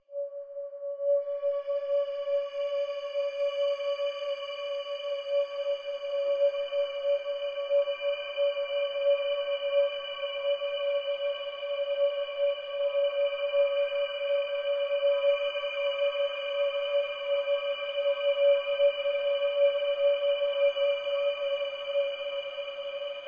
lucid drone 17 rv w tail
A wet finger rubbing a rim of an empty wine glass with heavy reverb added.
Ho, ho, ho! Merry Christmas and Happy New Year 2018!
It's been a long while since I've uploaded 'Lucid Drone' sample which proved the most popular and demanded among my lot. Now here comes a newer version. Essentially this is just a sound of a wet finger rubbing the rim of an empty wine-glass. Togu Audio Line Reverb III is used heavily.
drone, Line, finger, wet, Audio, glass, reverbed, Togu, lucid